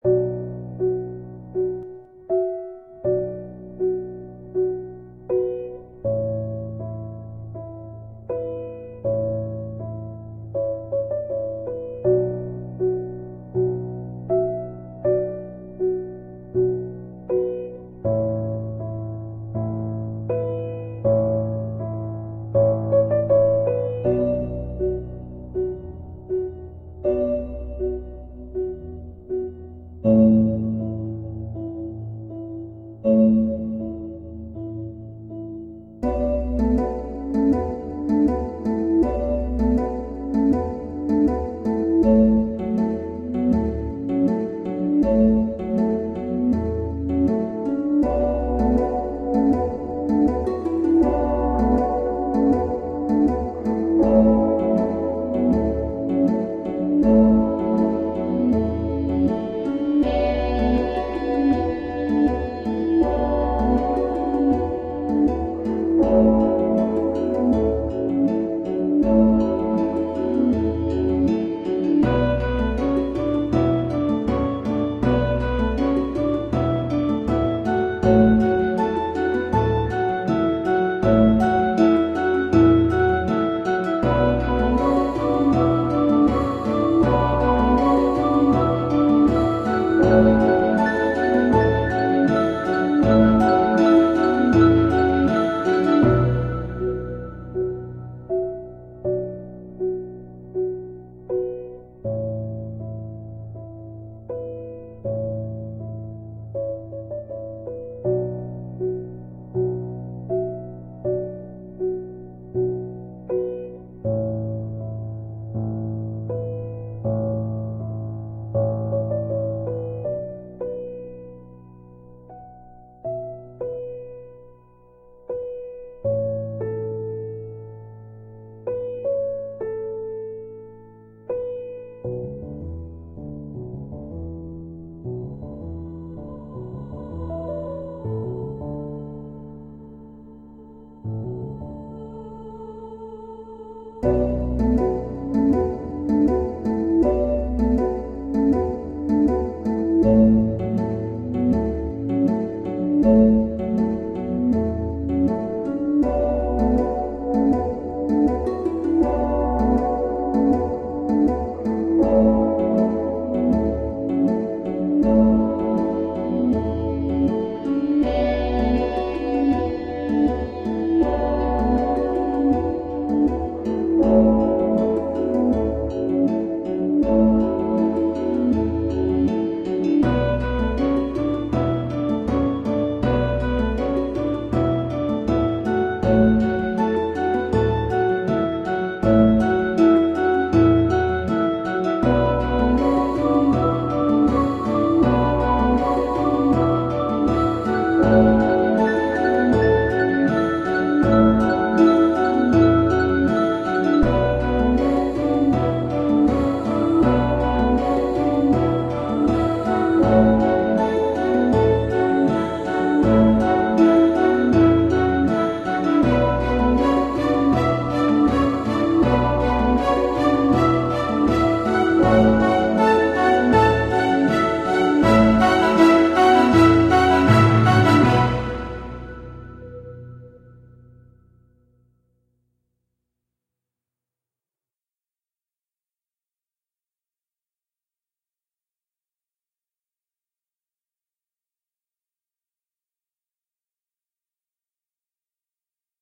Emotional Spiritual Soundtrack - Respect
drama, cinematic, uplifting, movie, best, atmosphere, beautiful, epic, zimmer, sleep, sypmhonic, emotional, score, misterbates, nature, music, respect, film, classic, strings, relaxing, free, ambient, hybrid, ambience, soundtrack, suspense, orchestral, timpani